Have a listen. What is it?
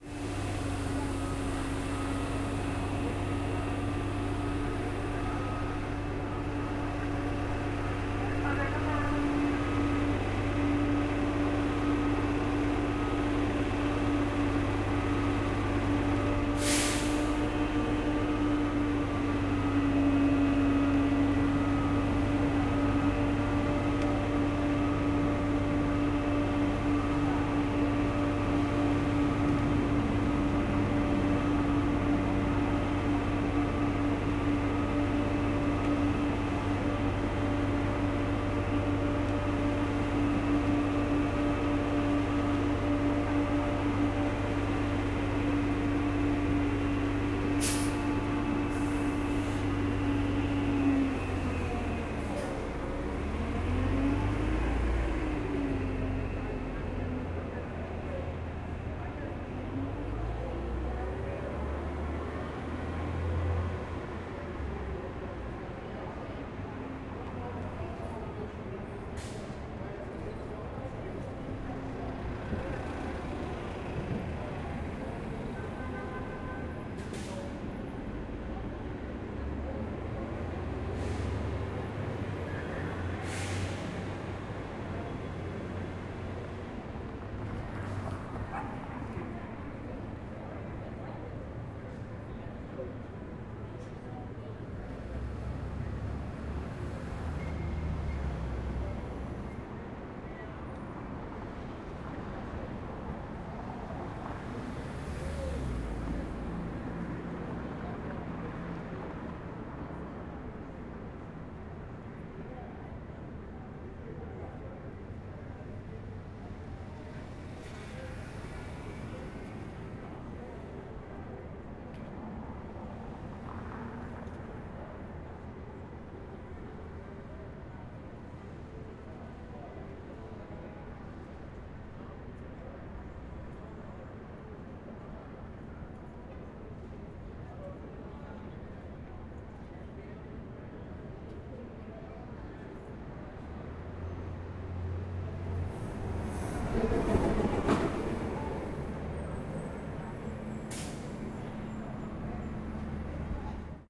LA Streets_5th and Broadway_Evening_1-EDIT
Recorded in Los Angeles, Fall 2019.
Light traffic. Buses. Garbage truck. Indistinct voices. Pedestrians.